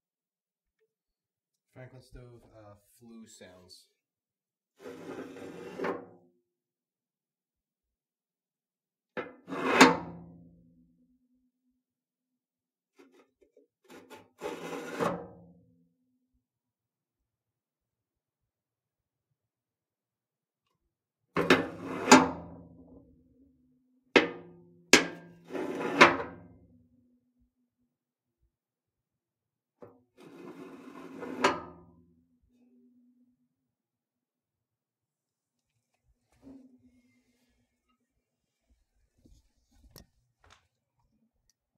Various sounds from VERY old franklin wood burning stove